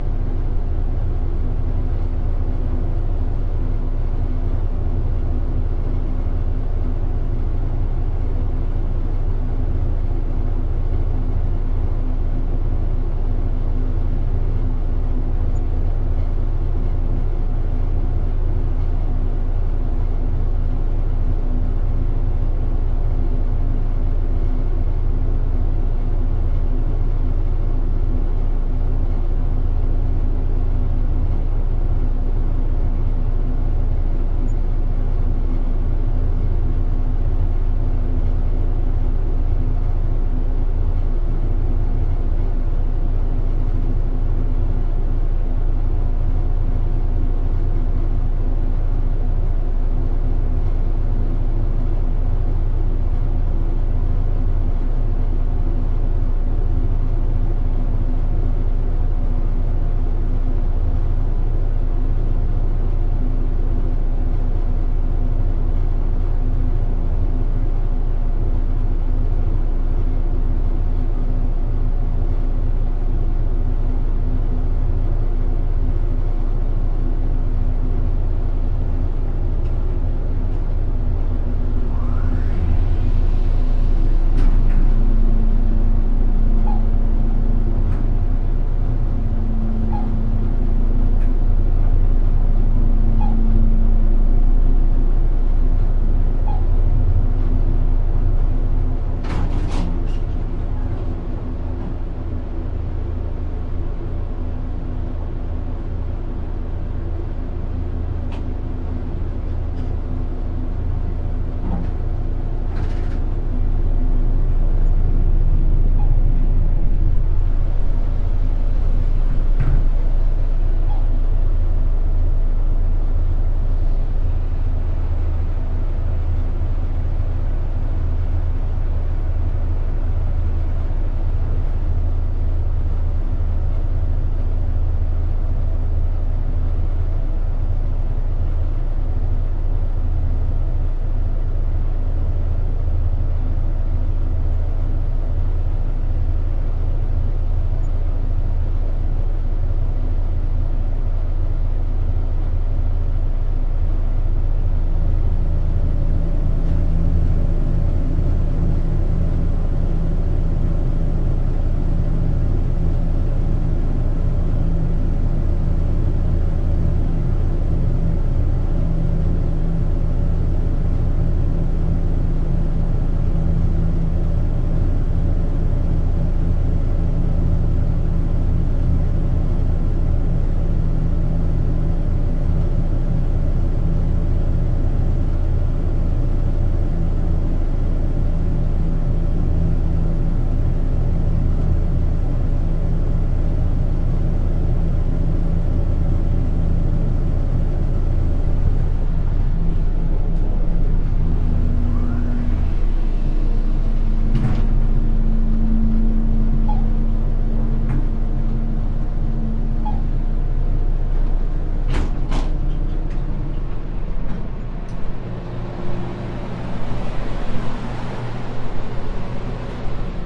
room tone elevator still +up and down floors
down, elevator, floors, room, still, tone, up